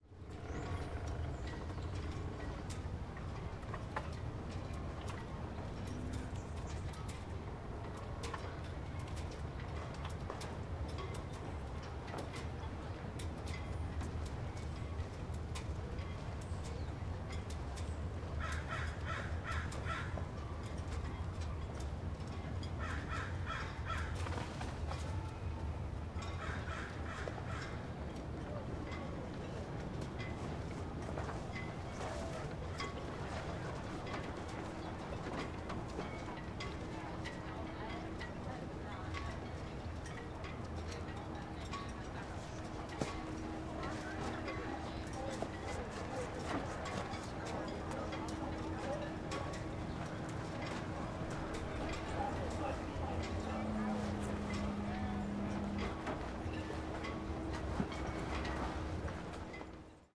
Harbor Ambience 1
Ambient sound at a harbor - boats at the dock, waves lapping, sails/flags flapping.
Recorded with a Sennheiser 416 into a Sound Devices 702 Recorder. Used a bass rolloff to remove rumble. Processed and edited in ProTools 10.
Recorded at Burton Chace Park in Marina Del Rey, CA.